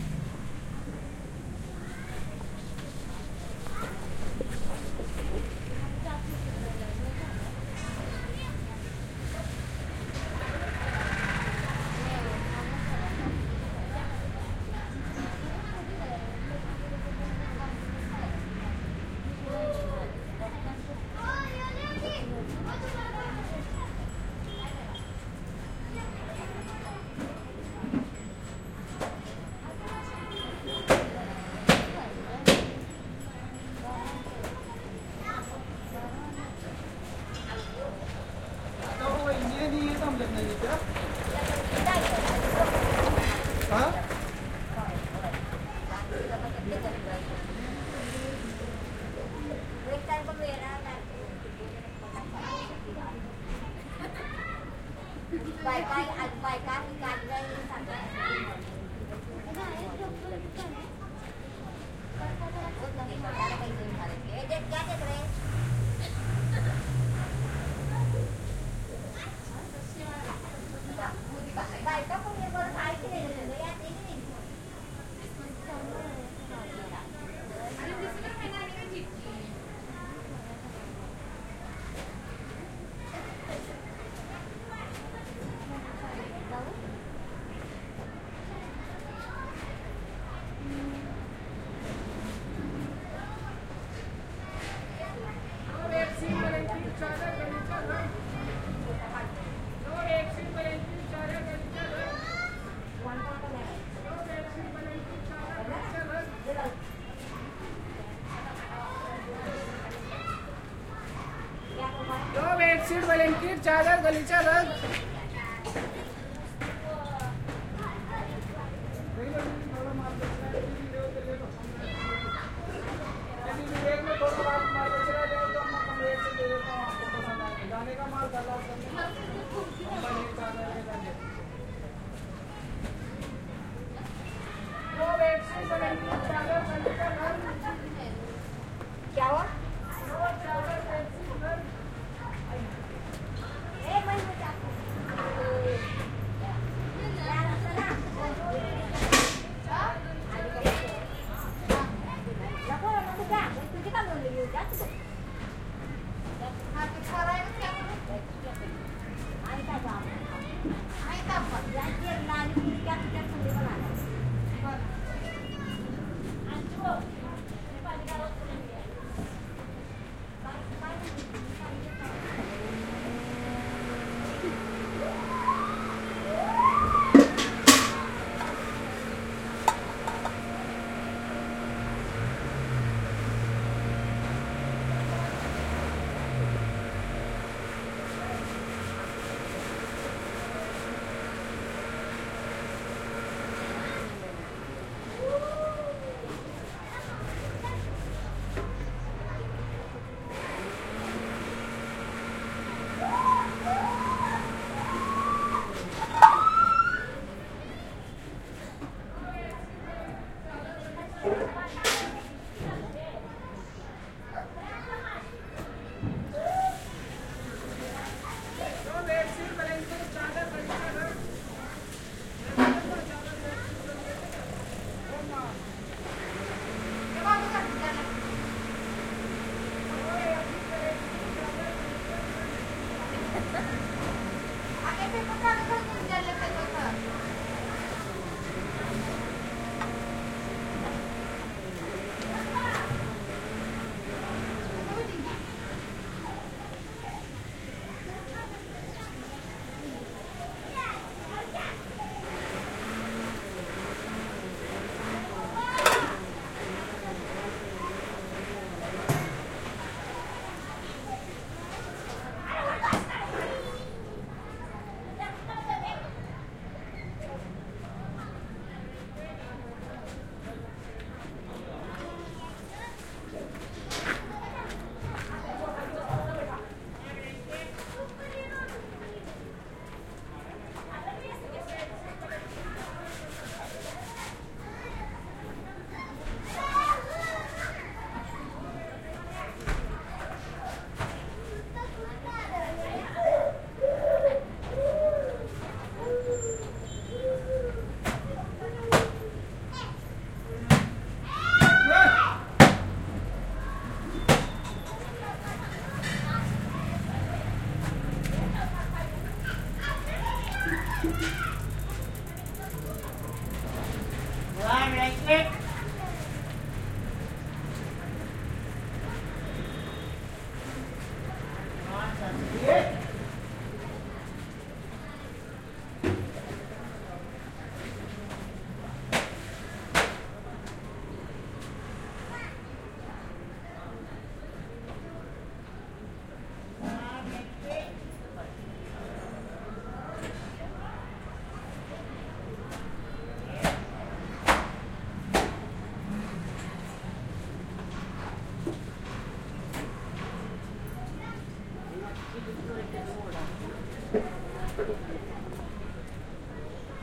India small street neighbourhood voices
India , Kolhapur the ambiance of a small street. Voices, housework noises.
Schoeps ORTF